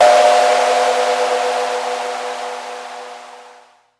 The Yamaha CS-15 is analog monosynth with 2 VCO, 2 ENV, 2 multimode filters, 2 VCA, 1 LFO.
analog, cs-15, yamaha